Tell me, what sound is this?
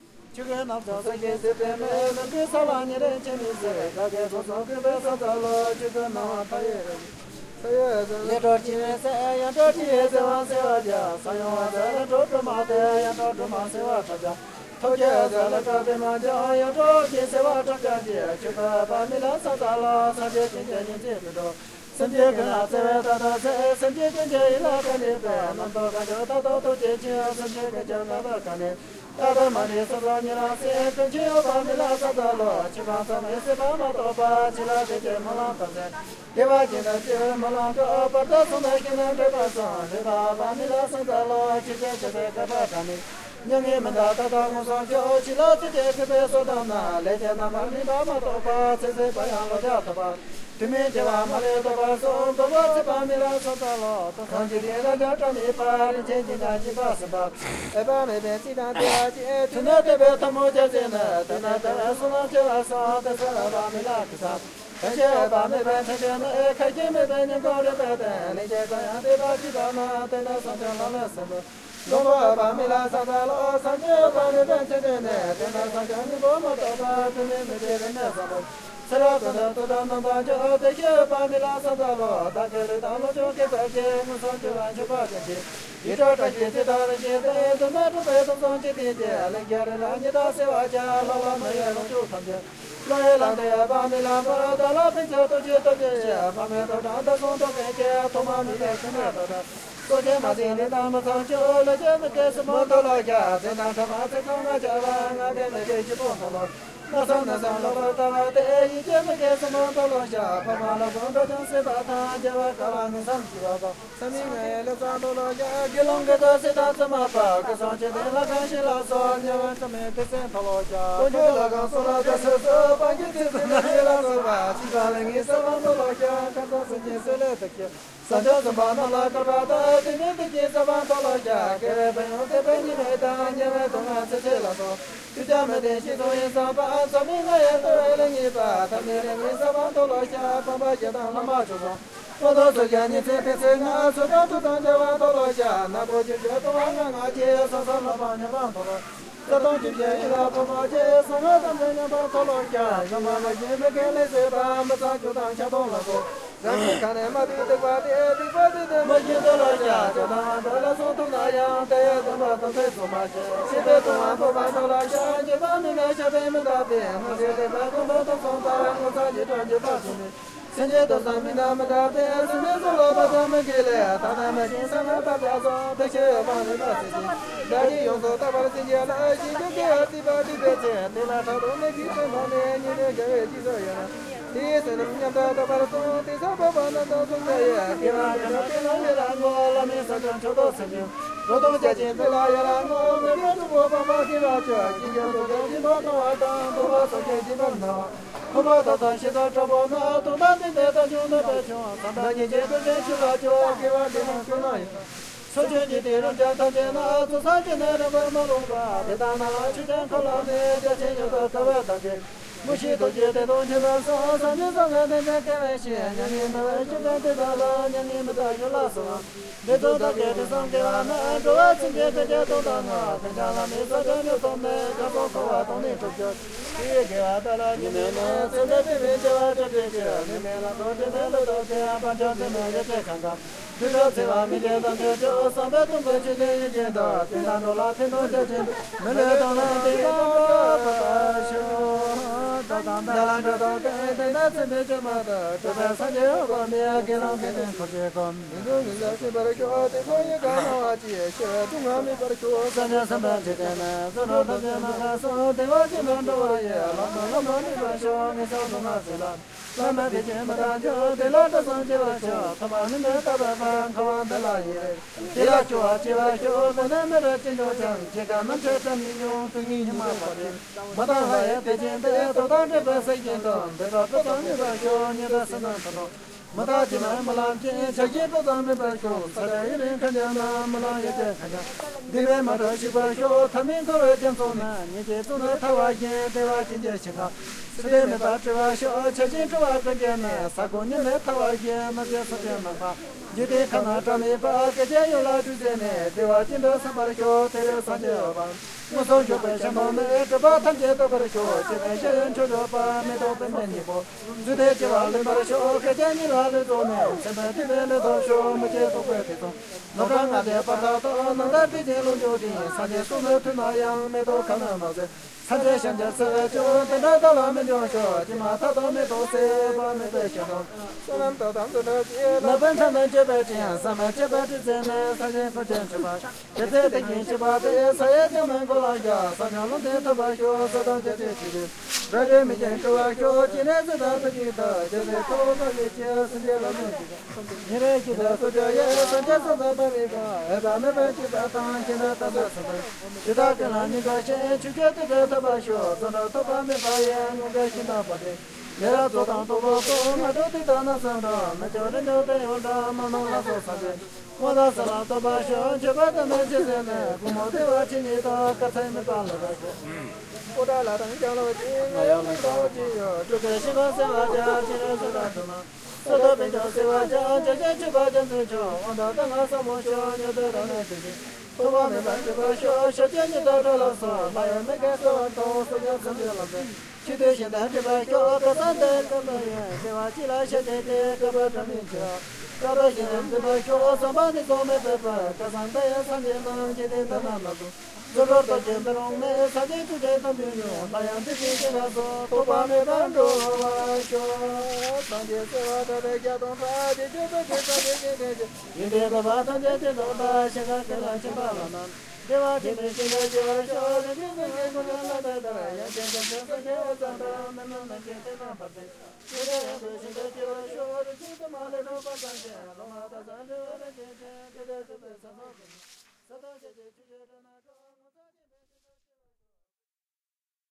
Tibetans reciting prayers at the Jokhang ཇོ་ཁང།
Field recording of Tibetan pilgrims and lay Buddhist practitioners reciting prayers outside the Jokhang temple in Lhasa, Tibet. Dec 2011 with the sound of other pilgrims prostrating, offering mandalas and circumambulating the temple in the background.
ཇོ་ཁང།
chant, temple, prayers, tibetan, buddhist, mandala, tibet, chanting, reciting, dr-1, field, practitioners, prostrations, recording, praying, recitation, lhasa, prostrating, jokhang